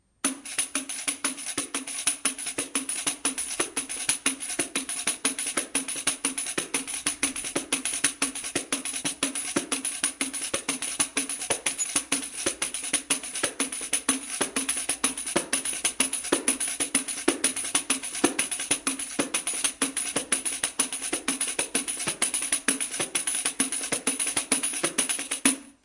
Playing a samba rhythm on different brazilian hand drums, so-called “pandeiros”, in my living room. Marantz PMD 571, Vivanco EM35.
drum, groove, rhythm, percussion, brazil, pattern, pandeiro, samba